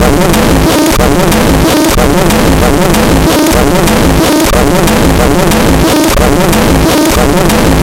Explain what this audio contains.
DR Ruiner noise loop1
a, bent, circuit, dr-550, drum, loop, machine, roland, samples